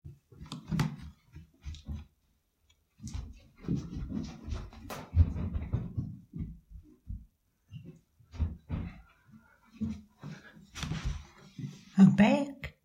Running Downstairs In The Distance (With Extra!)
Originally used for my video: Curly Reads: Upstairs [Creepypasta]
Recorded with a Iphone SE and edited in Audacity
stairs-down, distant, stairway, wood-stairs, curly-one, indoors, stair-run, run, callum, wooden, stairs, foley, distant-running, footsteps, callumhaylermagenis, run-downstairs, callum-hayler-magenis, wooden-stairs, curlyone